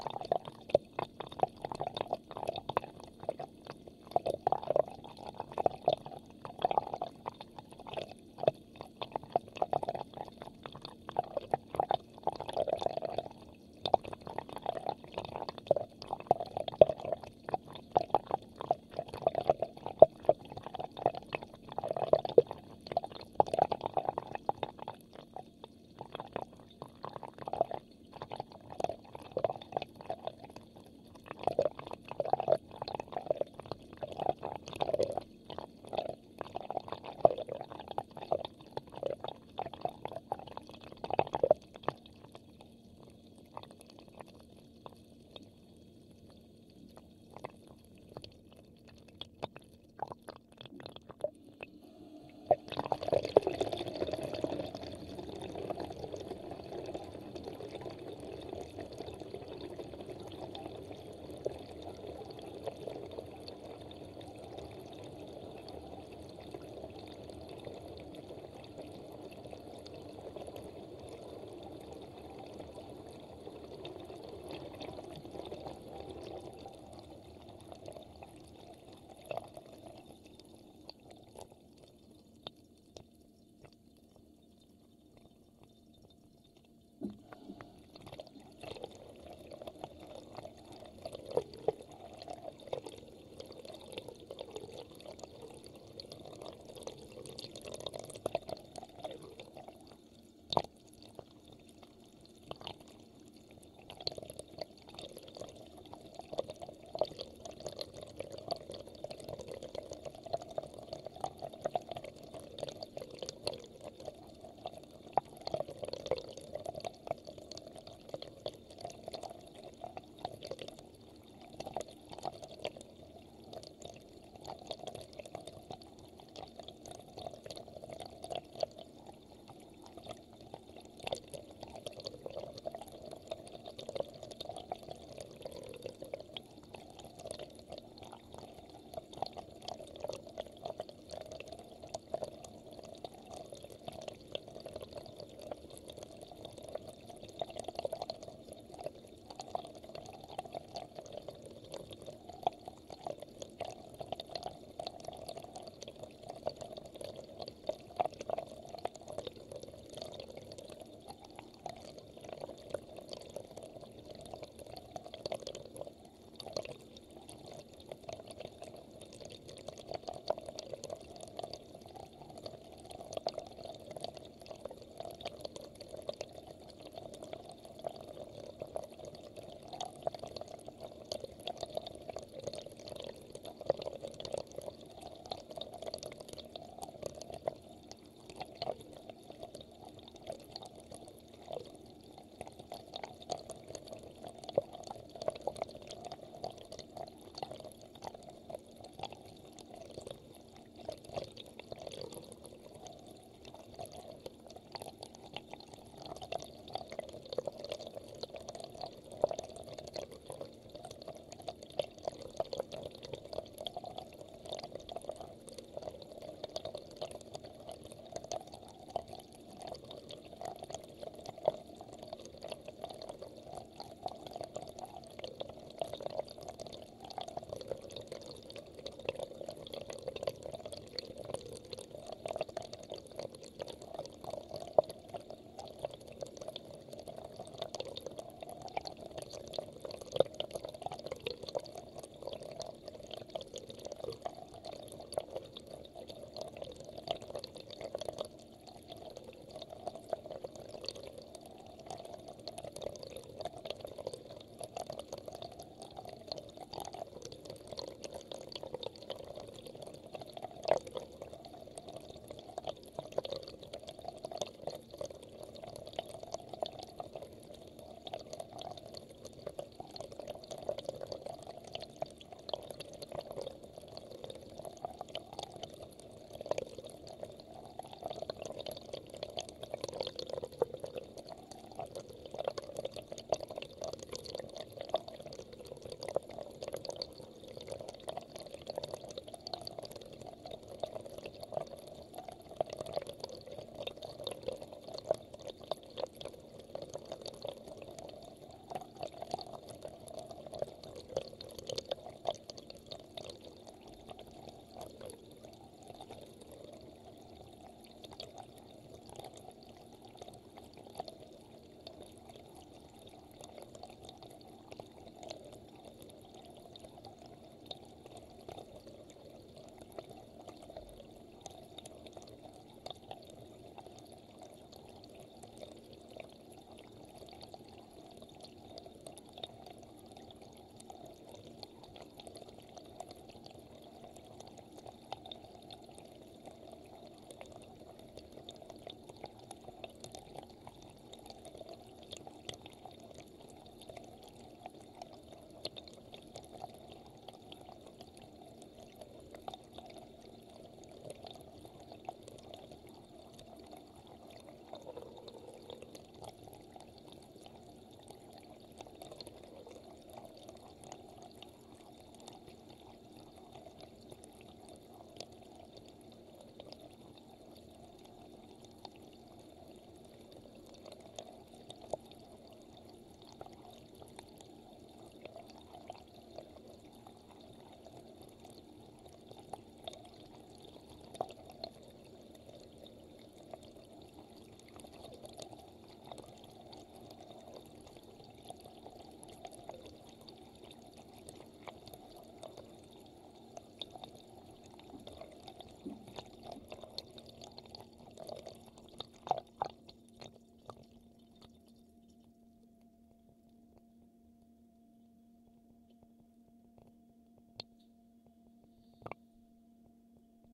ATM CONTACT bathroom sink water fast flow
Water goes through sink pipe. Recorded on Barcus Berry 4000 mic and Tascam DR-100 mkII recorder.
bathroom, sink, fast, through, atmosphere, water, pipe, atmos